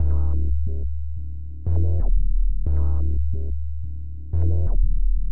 abstraction - bass 90bpm
Drone bass loop. Synthesized and analogue-y. Like the funky worm, but deep down. Created in Reason.
analogue, bass, downtempo, funky, synth, synthesizer, weight, worm